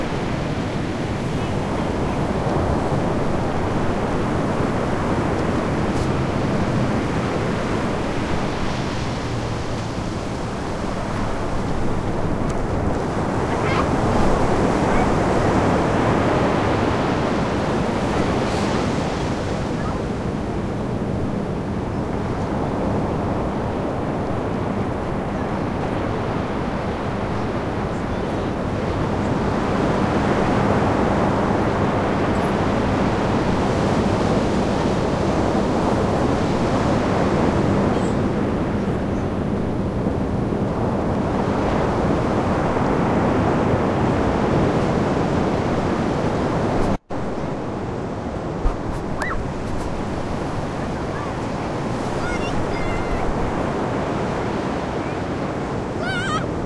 pacifica-linda-mar-ocean
the waves at linda mar, pacifica, california. kids playing in the waves.